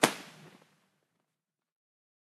Recording of a fire arrow being shot.